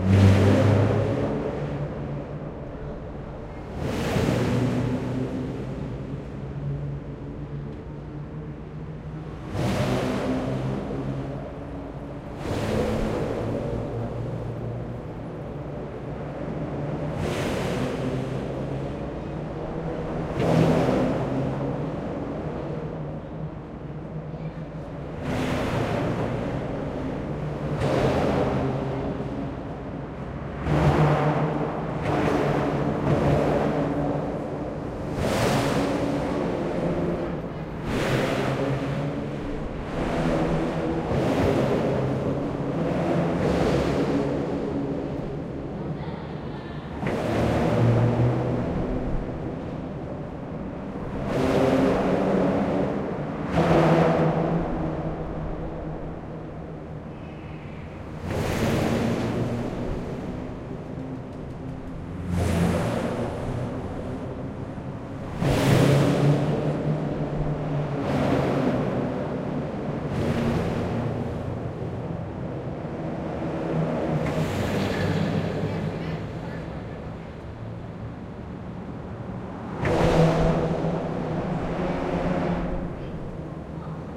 under the bridge
I placed my mics under the Hawthorne Bridge in Portland where the road surface changes from normal pavement to a metal grate which makes an interesting noise when cars drive on it.
Recorded with AT4021 mics into a modified Marantz PMD661.
field-recording, oregon, cars, people, city, bridge, trucks, portland, phonography, grate, vibrate, traffic